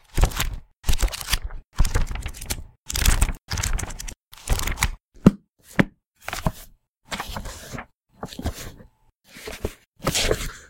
A collection of sounds of a magazine flipping through its pages and a heavy book slamming shut.
Used Audacity's noise removal filter to to remove background noise.
For this file, I isolated the samples I liked and left a small gap of silence between them for separation later.